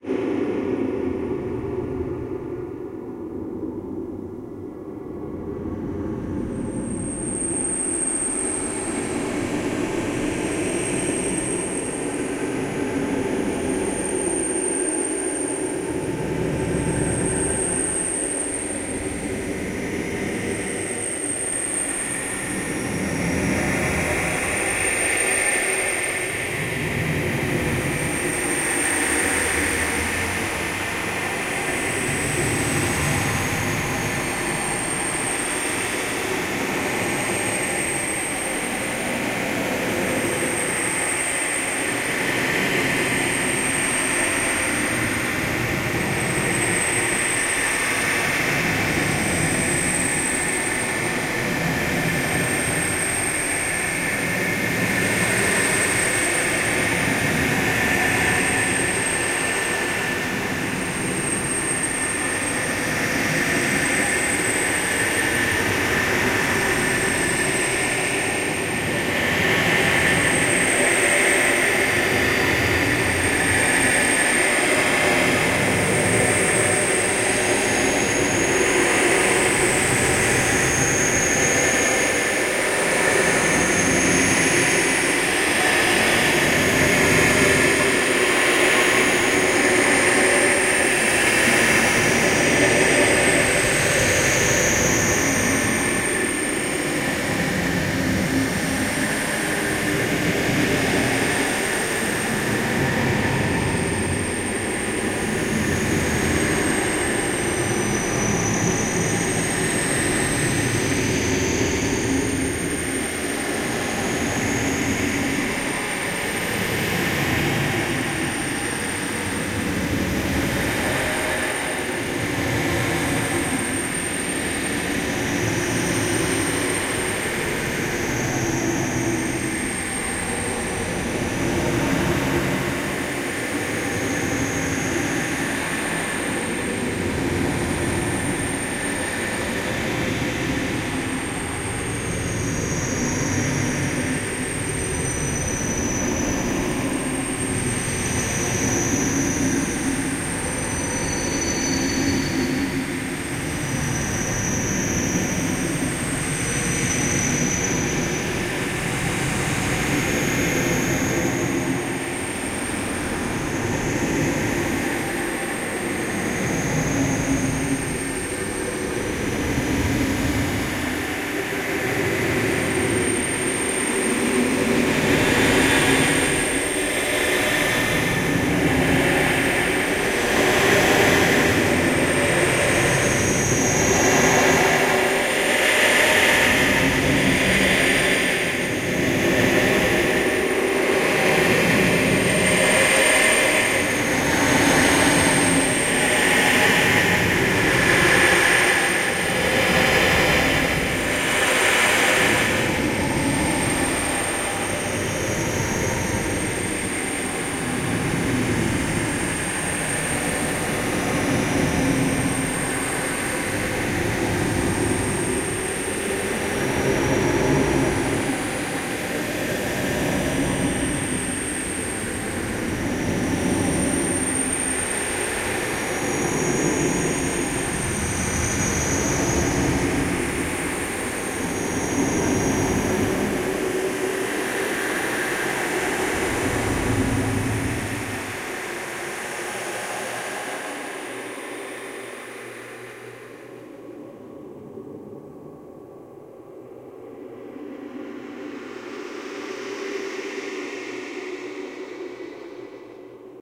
Made this by shaking some coins, spinning some coins and taking advantage of a squeaky door on my desk. Processed through paulstretch. The result turned out pretty interesting so I figured i would upload it here.